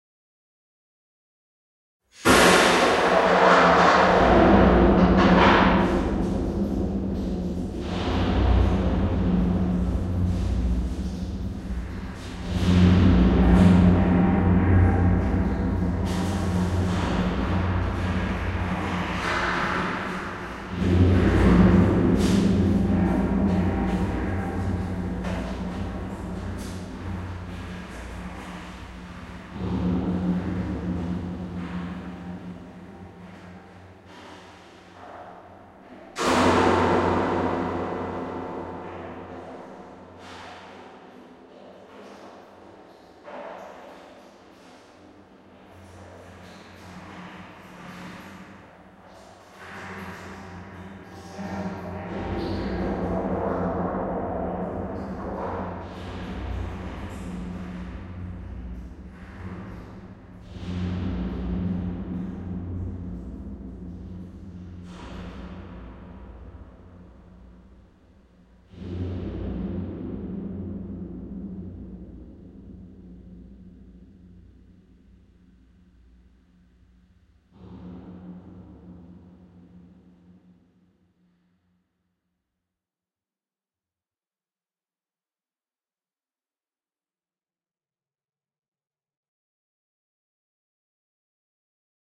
A stylised prison soundscape I made for a stage play